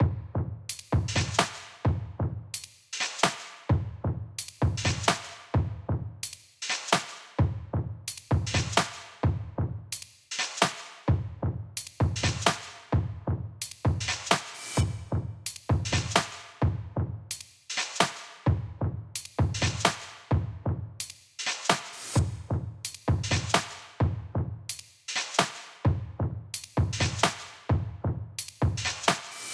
130 bpm post beat
a simple beat made in ableton
some reverb, pitch etc.
enjoy it!
post, burial, ambient, fx, dark